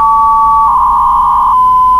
BPSK 250 baud data mode. Recorded straight from an encoder. May be useful, who knows :) - Need any other ham data modes?
250, baud, data, radio